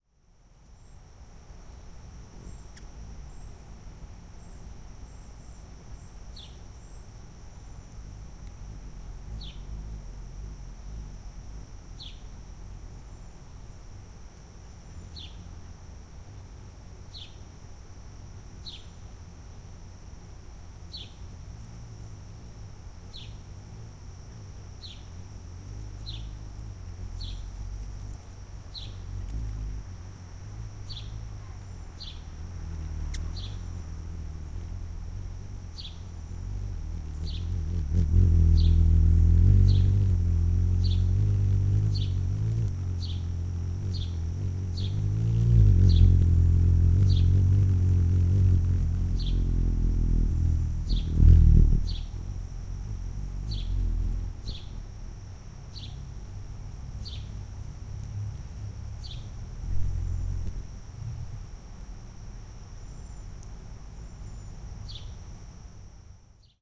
This is a recording of a single hummingbird feeding in a cluster of bee-balm. At first the bird's wing-beats are very quiet, blending in with the background ambiance.
The sound of the wing-beats increases and diminishes as the bird hovers and feeds at different flowers in the cluster. There are rustling sounds as its wings brush against leaves. All of this takes place within about 2 feet of the microphone.
From around 46 to 52 seconds the bird is directly in front of the microphone, looking at it as if to see if it was some sort of flower.
This was recorded using an M-Audio Microtrack hanging from a string, using the "T" stereo mic that is standard with the unit.